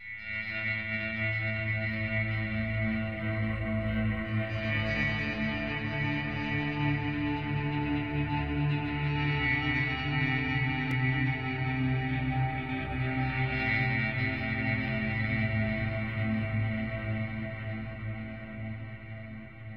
Abs pad1
i've made this pad with some tuned sounds from the softsynth absynth.